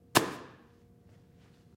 Theatrical Sandbag dropped near microphone on concrete floor.
Recorded with AKG condenser microphone M-Audio Delta AP